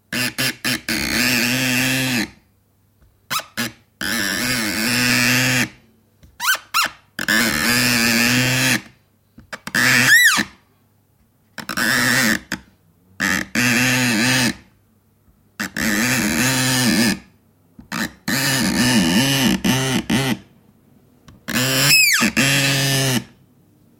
sounds produced rubbing with my finger over a polished surface, my remind of a variety of things
door, groan, grunt, screeching